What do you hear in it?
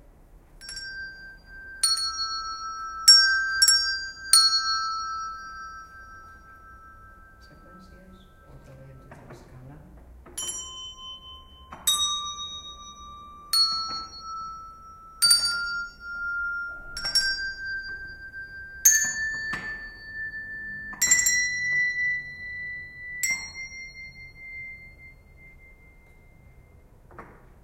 Small bells used by music teacher Flora Terensi, at Ausiàs March school in Barcelona. The Bells are tuned to the seven notes scale do re mi fa sol la si.